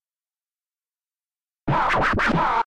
Scratch Paaah! 2 - 1 bar - 90 BPM (no swing)
Acid-sized sample of a scratch made by me with the mouse in 1999 or 2000. Baby scratch. Ready for drag'n'drop music production software.
I recommend you that, if you are going to use it in a track with a different BPM, you change the speed of this sample (like modifying the pitch in a turntable), not just the duration keeping the tone.
Software: AnalogX Scratch & Cool Edit Pro 2.1